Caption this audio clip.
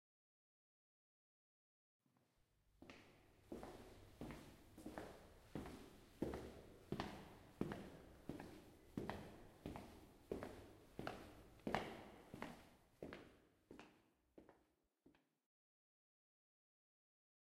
Walking in hallway